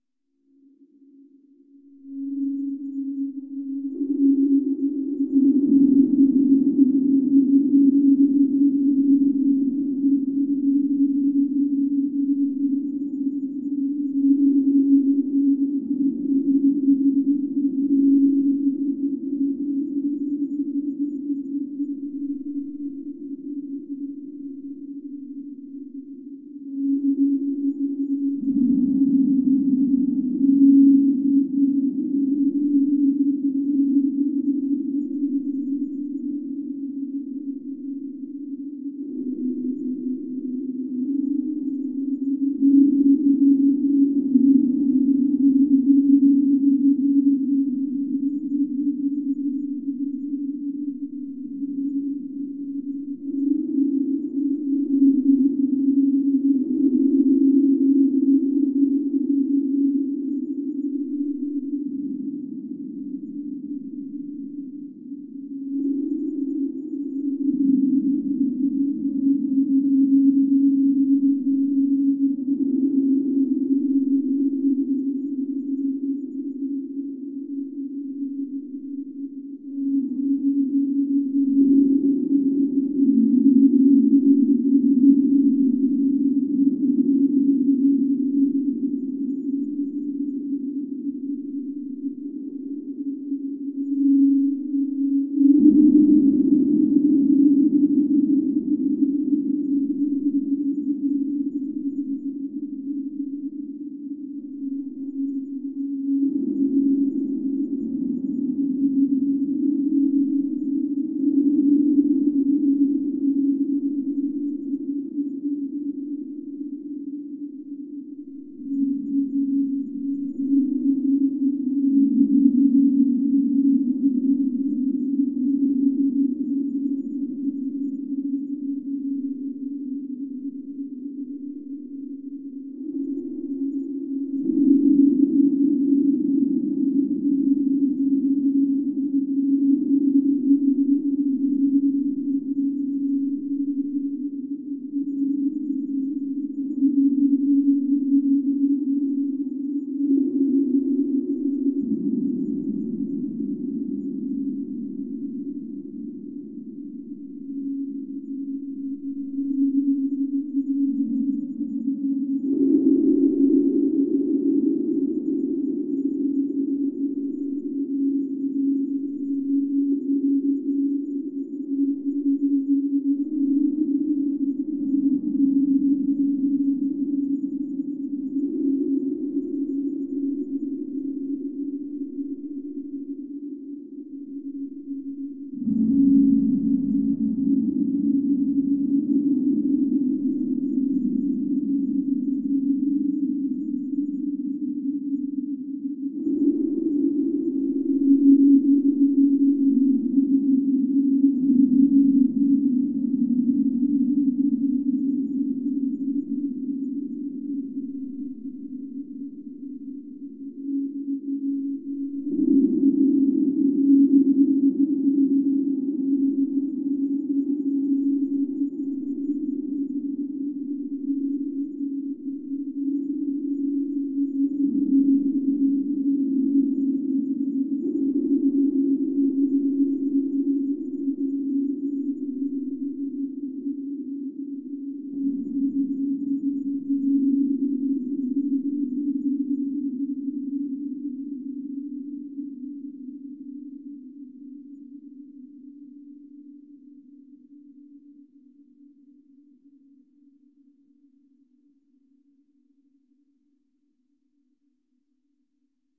EZERBEE DEEP SPACE DRONE AAAAA
This sample is part of the "EZERBEE DEEP SPACE DRONE A" sample pack. 4 minutes of deep space ambiance. The sound was send through the Classic Verb from my TC Powercore Firewire.
drone, effect, electronic, reaktor, soundscape, space